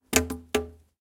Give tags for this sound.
drum percussion sd snare snare-pipe sound

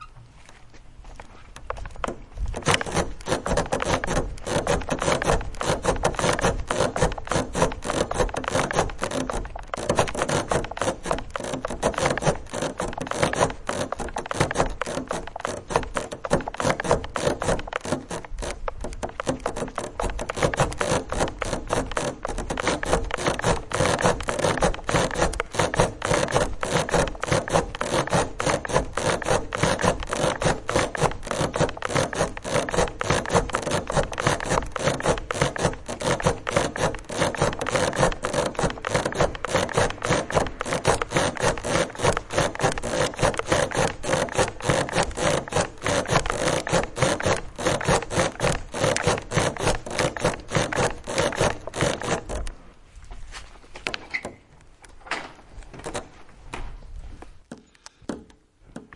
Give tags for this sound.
Bottles drum march pack plastic street water

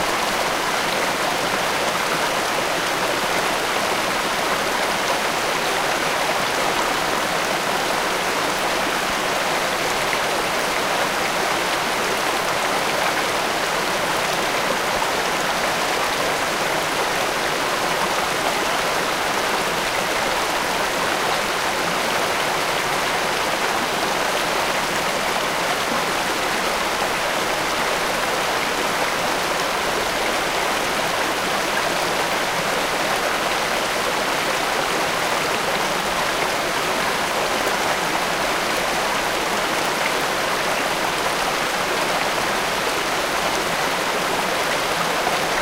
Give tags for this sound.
built-in-mic water wikiGong

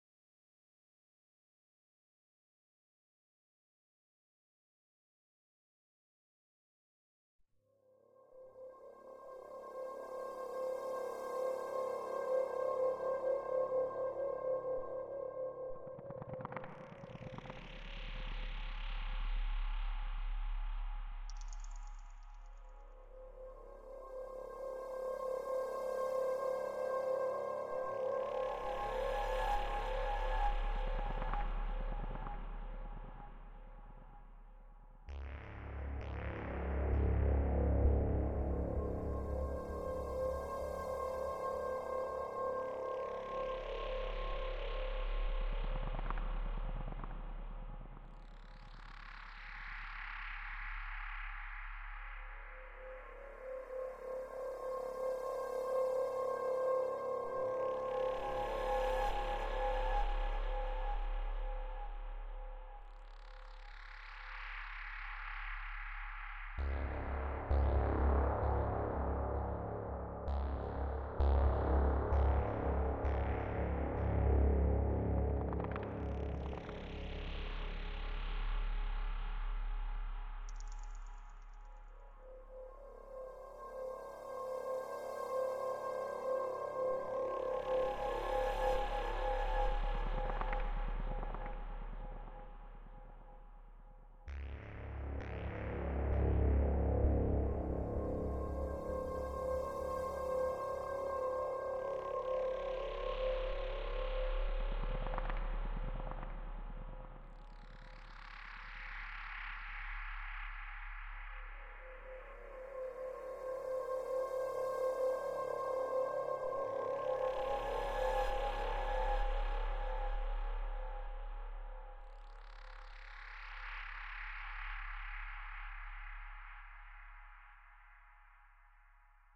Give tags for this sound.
Dead Horror Scary Scifi Space

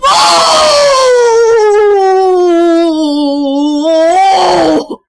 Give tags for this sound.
woman exclamation voice english scared speak talk female startled jump scare

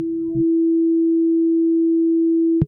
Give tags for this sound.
ppg multisample sub bass subbass

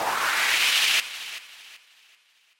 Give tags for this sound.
noise space spacey synthetic white wind

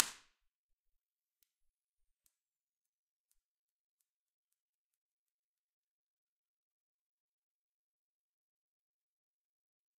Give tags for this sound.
response reverb ir Finnvox convolution impulse studios

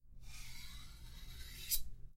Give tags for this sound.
Bright Sword

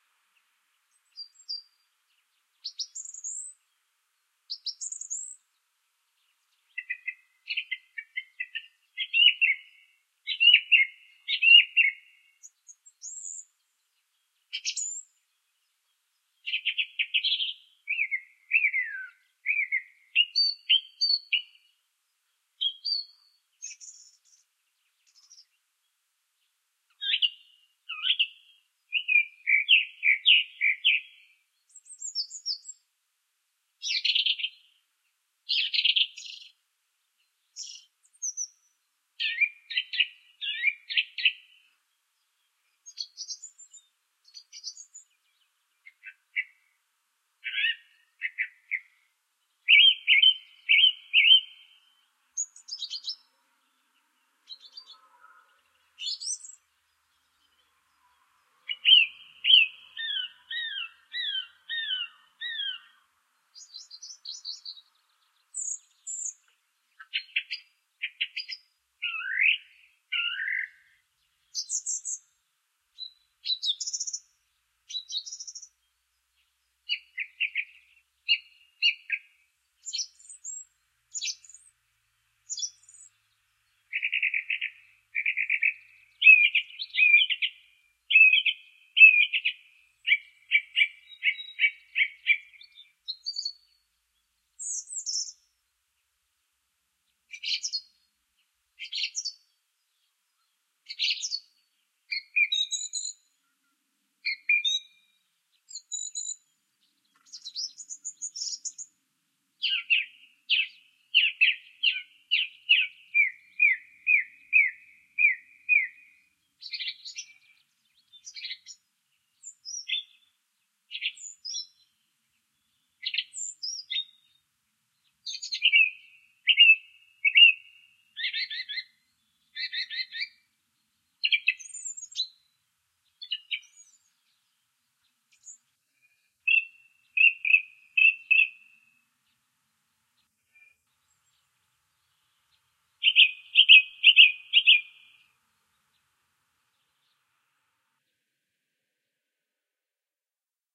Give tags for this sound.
bird field-recording turdus-philomelos mono song

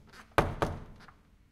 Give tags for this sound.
Door Close

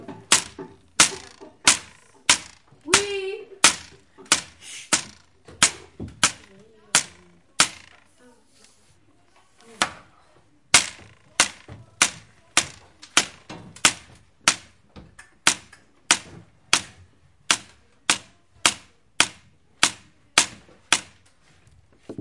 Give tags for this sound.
France Paris recordings school

